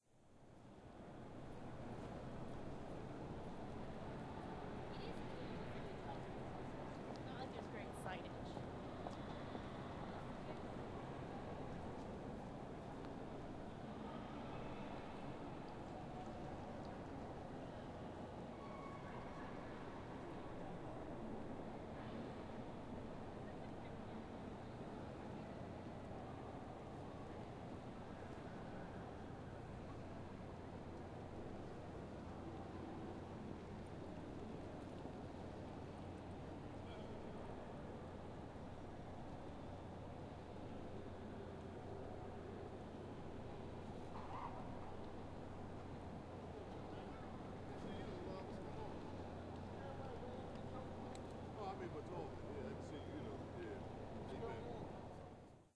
03 Philly Street Ambiance #2
Recording of Philadelphia, Pennsylvania, USA street.
city, philadelphia, street, urban